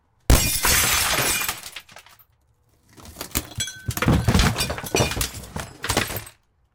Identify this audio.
window break with axe glass shatter in trailer
axe, break, glass, shatter, trailer, window